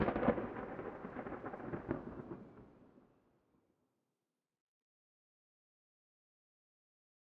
Field-recording Thunder London England.
21st floor of balfron tower easter 2011